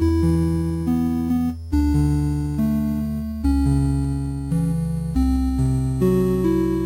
8-bit Chords
awesome, chords, digital, drum, game, loop, melody, sounds, synth, video